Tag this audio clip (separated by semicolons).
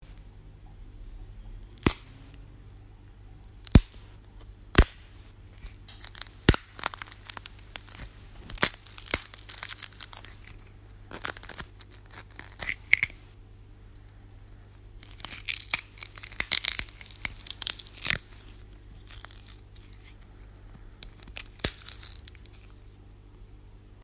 horror; body; bone; crack; fracture; vegetable; snap; gross; break; celery; bones; unpleasant; twist; click; gore; flesh; crunch